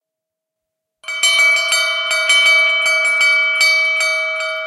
Tall ship alarm bell
Alert, Emergency, Alarm